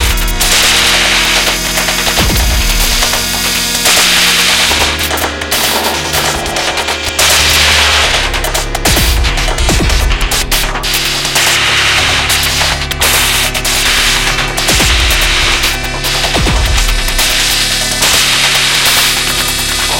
BZH GrainLoop 72
Rhythmic pattern created from sampled and processed extended trumpet techniques. Blowing, valve noise, tapping etc. materials from a larger work called "Break Zero Hue"